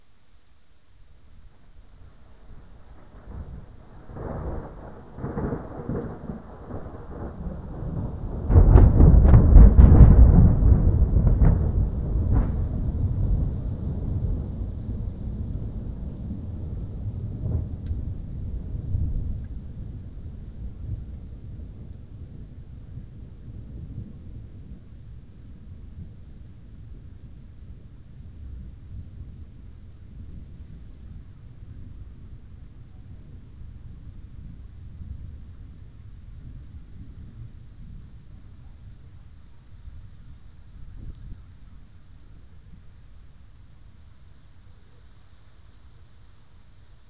Loudest thunder from 4th September 2009 thunderstorm. Recorded by MP3 player. Recorded in Pécel, Hungary.
thunderstorm, thunder, weather, field-recording, lightning